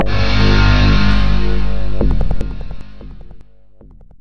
vw-undergrindust
Some strange electric machine, created in Virtual Waves!
distortion, industrial, processed